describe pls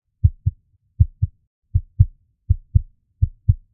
A simple heartbeat I made for a project, by clapping my hands close to a microphone.